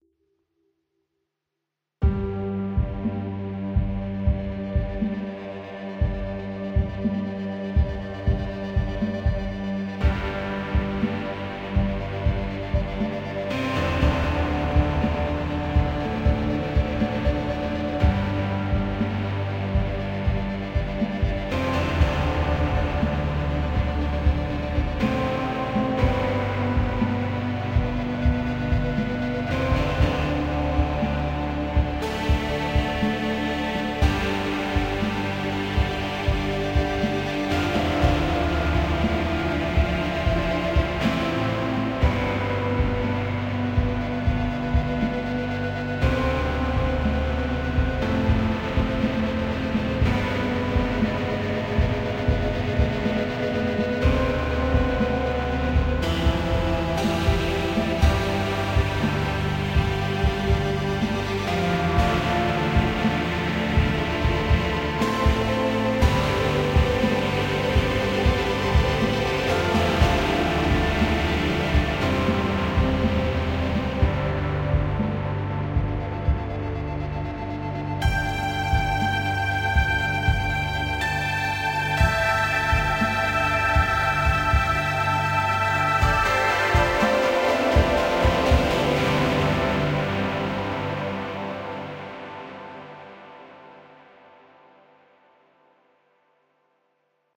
Years lost
Free soundtrack/ambient for using with various type of movies.The tone is almost grainy with influence of 70-80 progressive music.
progressive, classic, 80, 70, machine, lost, background, roman, civilization, time, atmosphere, grainy, ambient